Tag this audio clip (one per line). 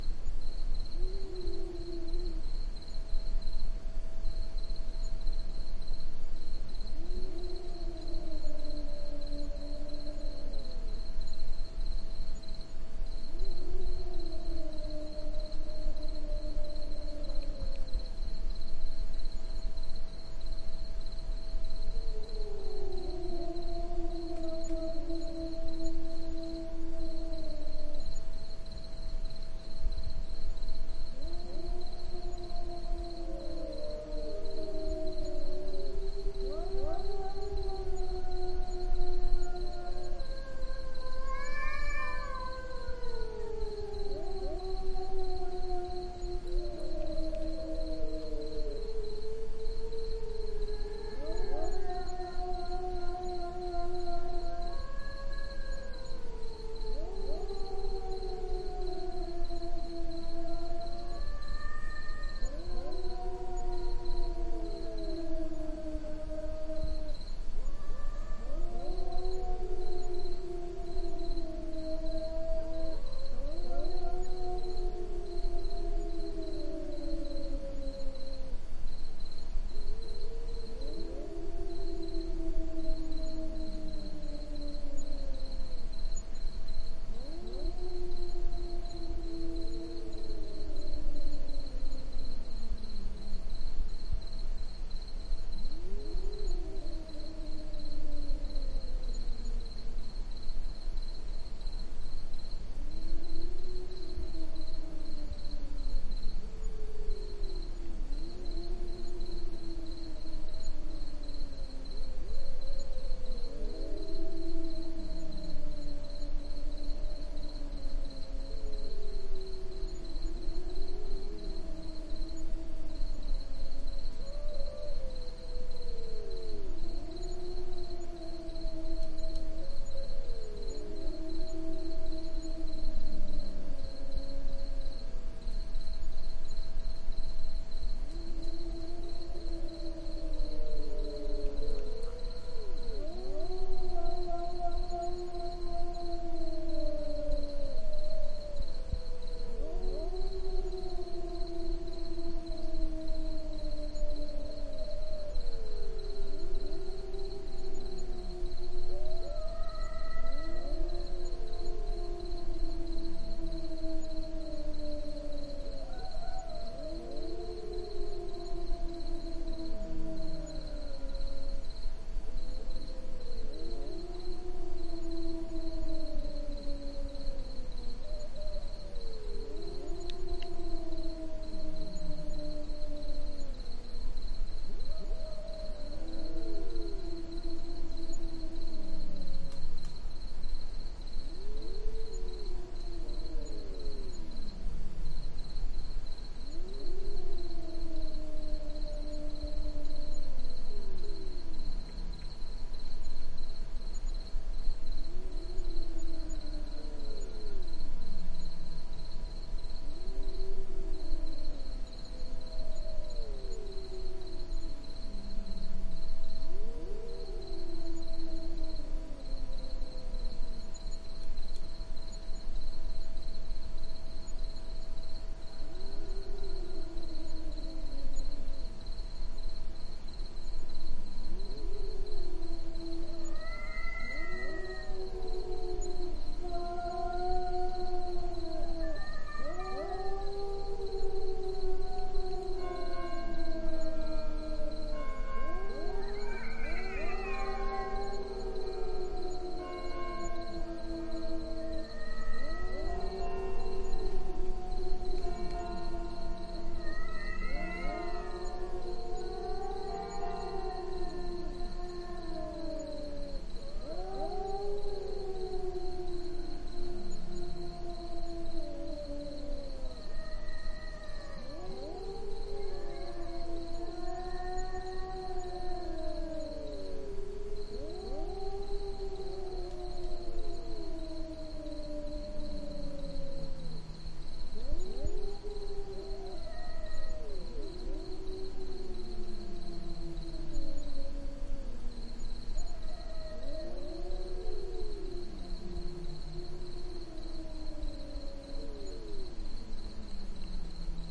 Cat
Katzenmusik
cats-scream
catsong
katze
cats-cry